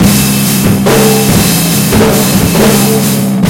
aroundtheturn(bassriff)
Short drum loop I recorded using the built in mics on an H4n digital audio recorder. I also used a bass riff I found on ccmixter:
drumloop, drums, h4n, heavy, loop, noise